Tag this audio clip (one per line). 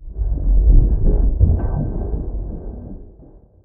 abstract
artificial
bleep
computer
digital
effect
electric
future
futuristic
game
glitch
noise
notification
robotics
sci-fi
sfx
sound-design
UI